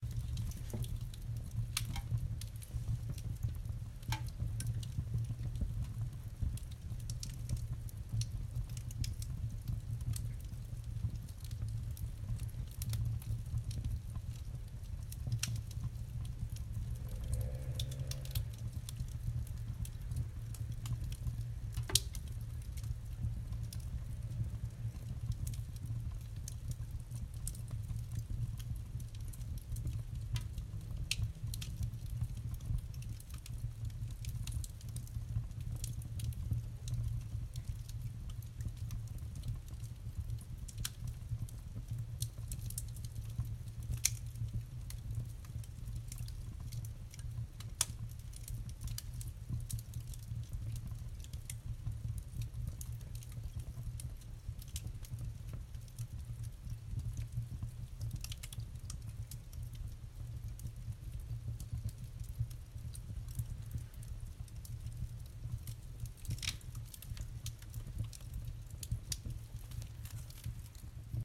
Fire crackling in fireplace
Fire recorded in a little fireplace, crackling.